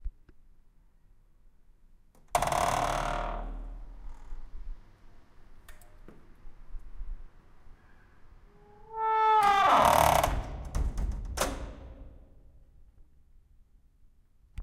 Door squeak 1
Heavy steel door opening, closing and locking. With beautiful squeak that gives you the creeps.
Recorded with Zoom H1